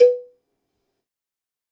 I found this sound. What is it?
MEDIUM COWBELL OF GOD 018
kit, pack, more, cowbell, god, real, drum